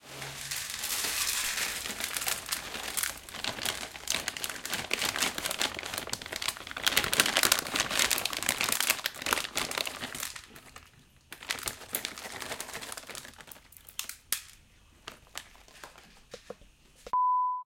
the sound of a cat food bag and then when pouring the food on a plastic plate